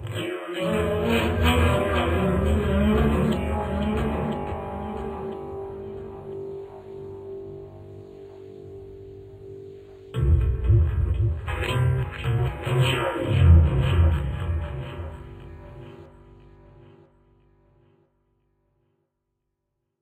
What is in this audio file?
tk 11 mic scrape 3 lo2e
A heavily processed sound of a mic scraping on guitar strings.
music, electronic, guitar, processed